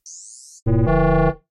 Synthesized version of drone activation sound from Oblivion (2013) movie.
Synth: U-HE Zebra
Processing: None
blip, communication, drone, oblivion, signal, ui